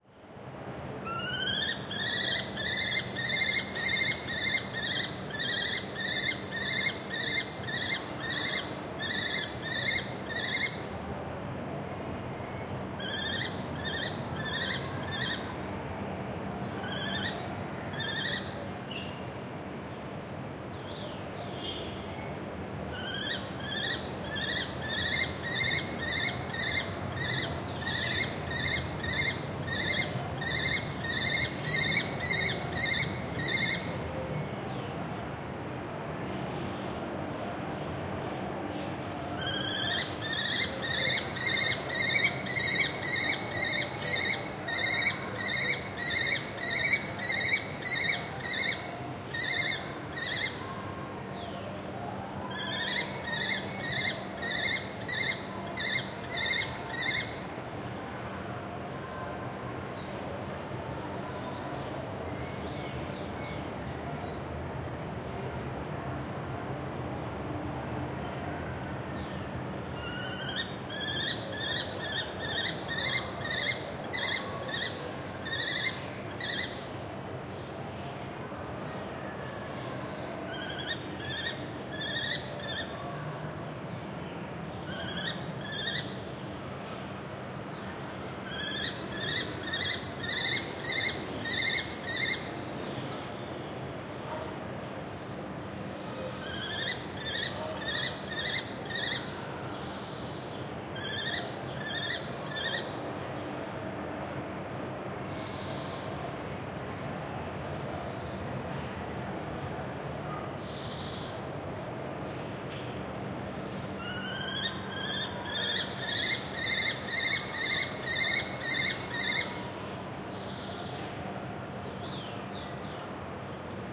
20190323.kestrel.male.AB
A male Lesser Kestrel (Falco naumanni) screeching to a nearby female. City noise in background. Recorded in late afternoon on my rooftop, using Audiotechnica BP4025 into Sound Devices Mixpre-3 with limiters off.
birds, city, field-recording, hawk, kestrel, nature, screech, south-spain, spring